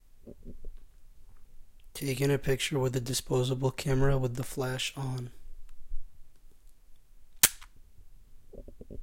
Picture with disposable camera with flash on
Recorded with a condenser mic, I recorded the sound of a picture being taken with the flash on with a disposable camera.
camera, flash, disposable, picture